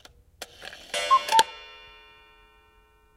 Kukuklok 1 slag
A small cuckoo clock from the Black Forest striking the half hour
clock, cuckoo, strike